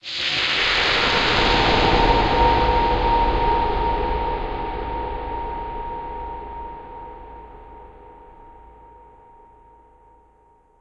sfx1 STS26 C-5
Sound effect made with H.G. Fortune STS-26, added reverb, EQ and ring mod.